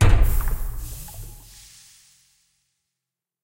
13862_adcbicycle_10
13853_adcbicycle_1
36963_krwoox_friture_long
What it is? A barrel filled with toxic fluids are dropped a few meters down to a hard floor. The fluid gets agitated and the barrel spews out some corrosive fluid onto a nearby wall.
Take 4.